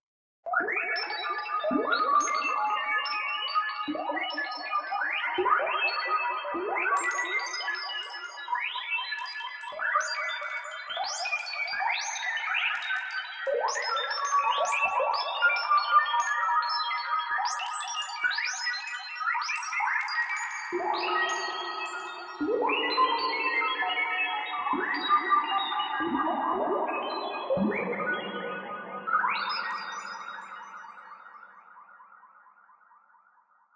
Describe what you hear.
Spacial Sci-Fi effects with stereo reverb. Advanced computer controls trough the space.